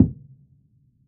a mid-range piano lid closing